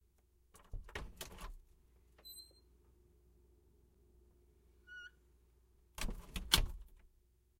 Door opening and closing 3
Wooden door being opened then shut, with light squeak.
close, closing, creak, creaking, door, doors, open, opening, squeak, squeaky, wood, wooden